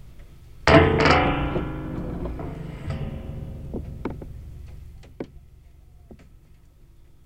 door spring05
Contact mic on a door with a spring
birds, boing, metal, metallic, piezo, spring, twang